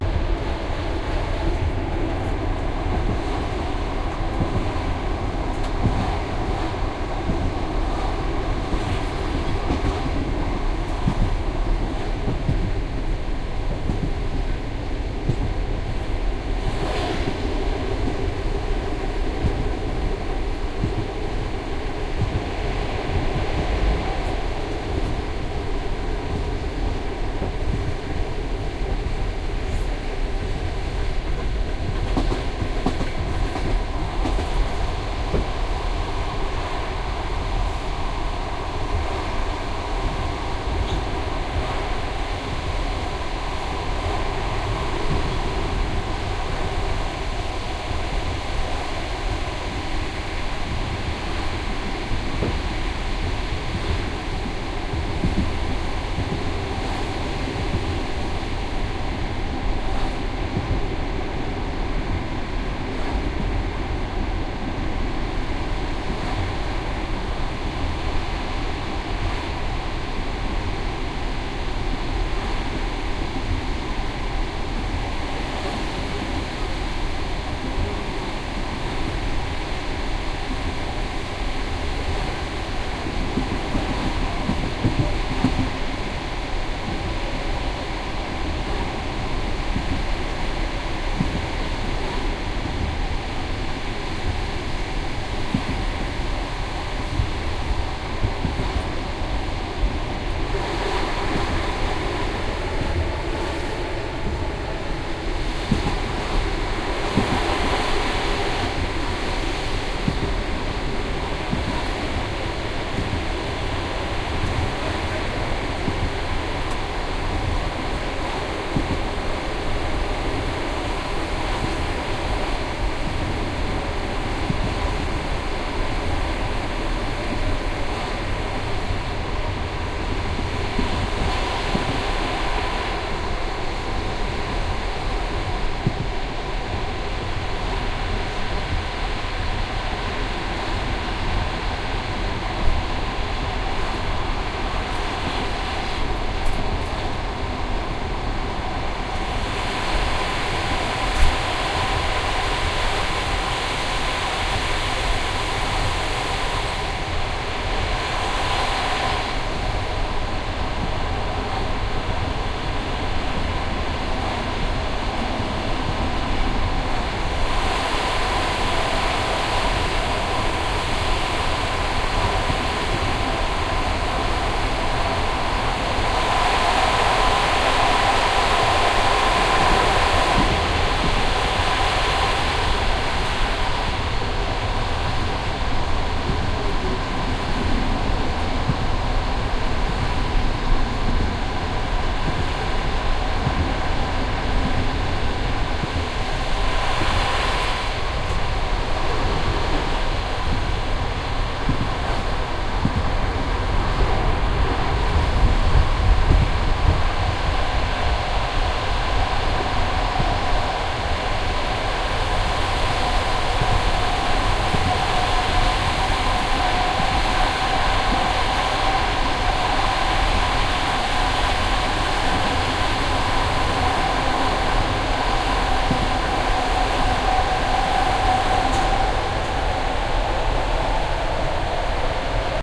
14-train-to-donetsk-looking-out-window
Looking out the window of a train going from Kiev to Donetsk. You can hear the wheels squeeking against the tracks. And a little bit of the typical klok-klok sound you can hear in trains. A radio plays in the background and doors bang shut. I was looking perpendicular to the train, so you can hear the stereo of sounds coming from left to right. This is the best of the three recordings I made.
field-recording, inside, train, typical, ukraine, wheels